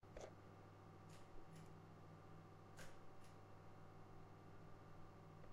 The light pull in a bathroom sound.
light-pull, bathroom, light-switch